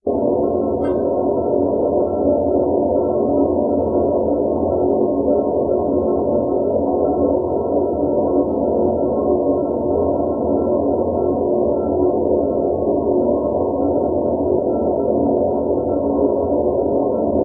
Background noise 3
Fixer 2. Adding the De Hisser.
Try out adding flangers and delays for fun sound. It can become an alien ambience or even horror ambience.